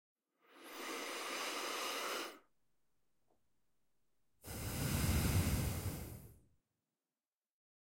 Deep Breath 1 1
Field, Human, Person, Design, Deep, Breathing, Foley, Recording, Air, Tired, Breath, Sound